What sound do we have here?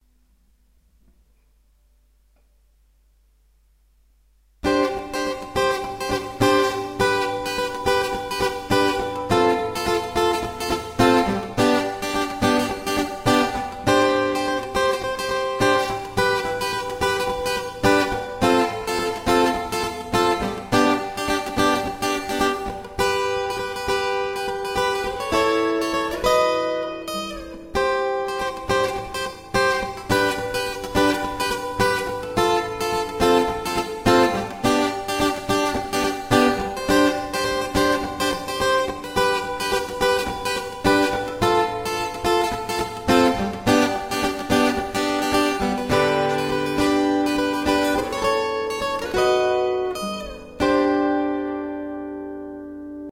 Positive tune
Positive guitar tune.
experimental, music, tune, guitar, atmospheric, acoustic